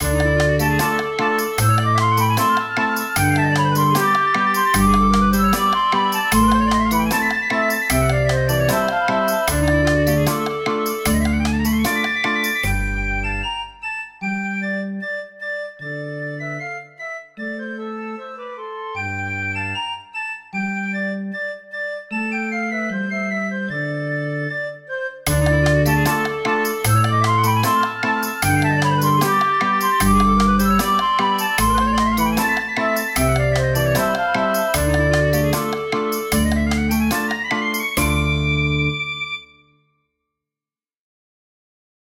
Medieval Life - Minstrels and Jugglers
This small file describes medieval minstrels and jugglers. I invented it for my knight tale and composed it in Musescore. I am happy if you like it!
percussion, history, musicians, guitar, bagpipe, Medieval, entertainment, Knight, minstrels, jugglers, tuba, clarinet, piccolo, Life, fairytale, middle-ages, instrumental